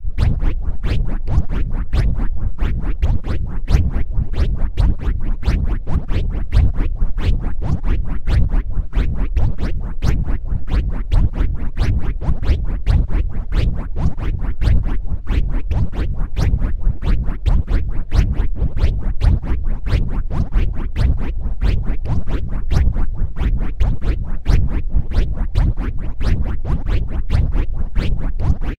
granular ambience 4 marchband
An imitation of Justin Masson's Wolfpack Marching Band. This was created entirely with granulab. It attempts to recreate an artifical interpretation of Jaymo's; AKA "French Pimp of Palmaire's" old marching band jams.